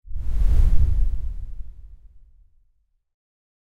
Woosh Low 04
White noise soundeffect from my Wooshes Pack. Useful for motion graphic animations.